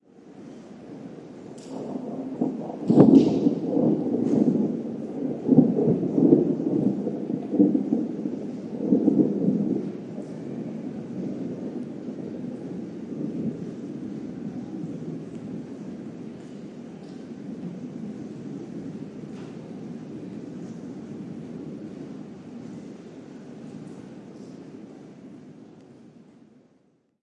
Soft rain and thunder. Primo EM172 capsules inside widscreens, FEL Microphone Amplifier BMA2, PCM-M10 recorder
field-recording, lightning, rain, thunder, thunderstorm